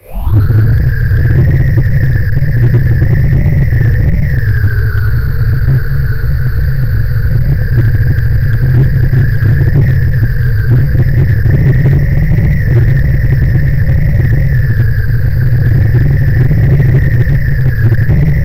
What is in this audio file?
heavy)windthroughcarwindow
Wind sound made by large amount of stretching a wave
mainly processed by the Gverb module in Audacity.
gusty, weather, whistling